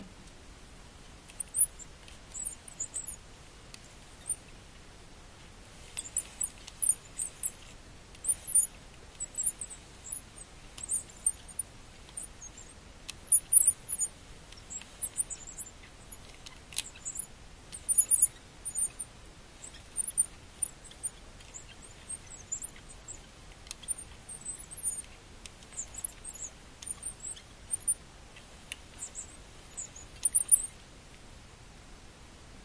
Recorder: SONY MD MZ-RH1 (Linear PCM; Rec level: manual 19)
Mic: SONY ECM CS10 (Phantom powered; Position: 4cm above)
This is the noise my computer ball mouse makes when moved. The squeaking is nearly the same regardless of speed or direction; At faster movement you can hear extra rattling of the dials.
computer, mouse, squeaky